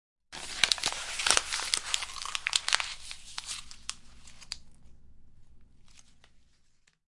Planta, ramas, morder
Planta, morder, ramas